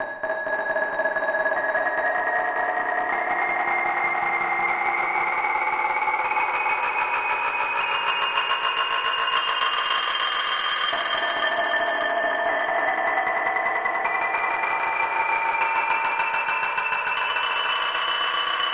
teapot on glass
the effected sound of a teapot striking a glass arranged in notes from c3
effected, glass, kitchen, teapot